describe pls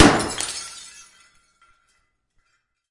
Sound of a 48" fluorescent tube lightbulb breaking in medium-sized concrete basement space